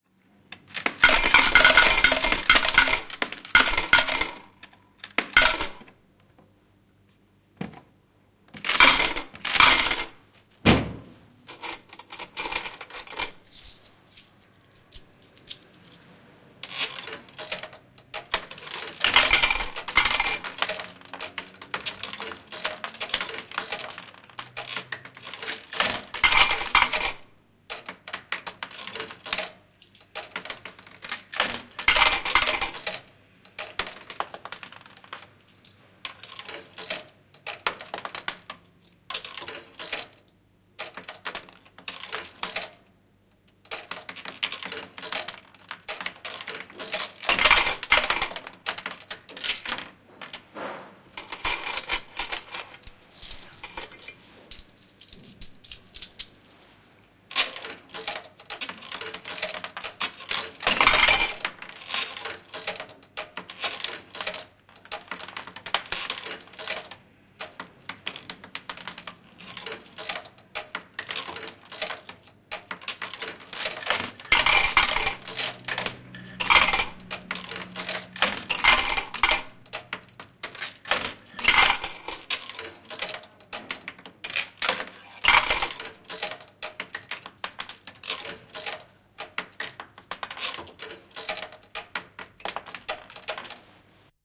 Peliautomaatti, Pajatso / Old slot machine, game, Bajazzo, playing sounds, wins, coins fall into a metal cup, coins tinkle
Peliä. Välillä voittoja, kolikot kilahtavat kaukaloon, rahan kilinää.
Paikka/Place: Suomi / Finland / Vihti
Aika/Date: 29.11.1977
Uhkapeli, Coin, Kolikot, Arcade-game, Gambling, Laite, Device, Machine, Raha-automaatti, Yleisradio, Automaatti, Peli, Suomi, Raha, Yle, Finland, Soundfx, Laitteet, Slot-machine, Game, Finnish-Broadcasting-Company, Tehosteet, Gamble, Field-Recording, Pelaaminen, Rahapeli, Money